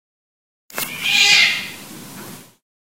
Cat Screams
I was able to catch a brief moment of my cats fighting. Our bossy, mean cat hates being on the bottom of his brother.
brief, fight, scream, sound